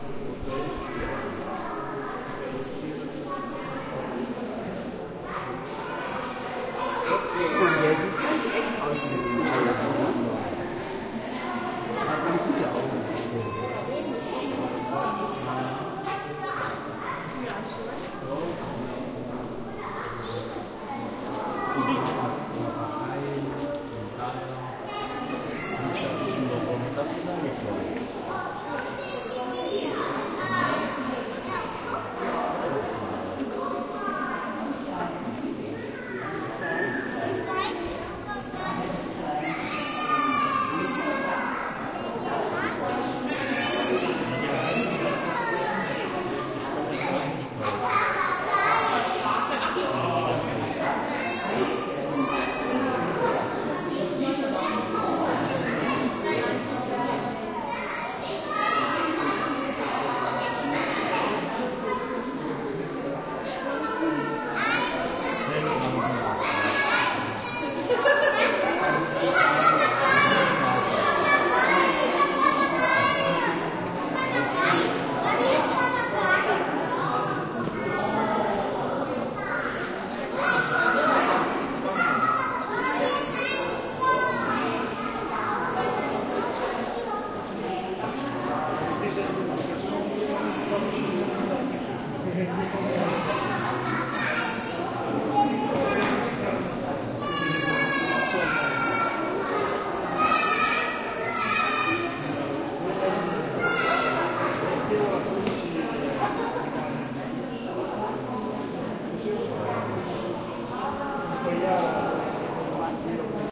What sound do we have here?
Stereo ambient recording with a Tascam DR-100 Mic's. One of the Exhibition Hall of the Museum für Naturkunde in Berlin. Recorded a Sunday Afternoon. Different Position.